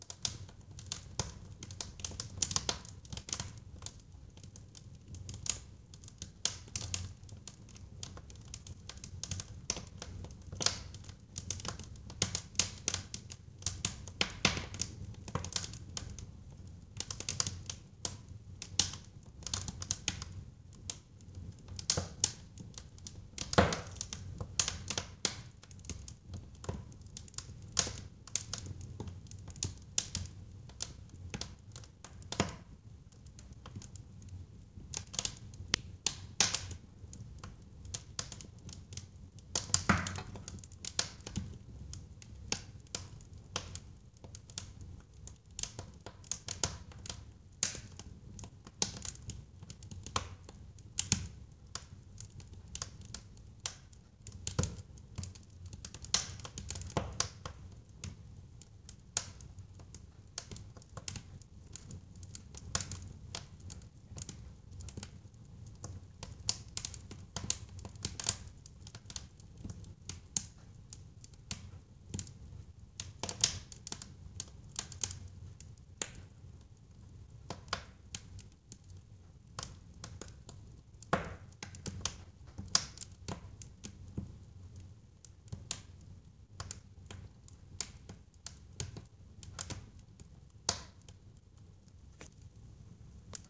The Fireplace 3
burn, burning, combustion, crackle, crackling, fire, fireplace, flame, flames, sparks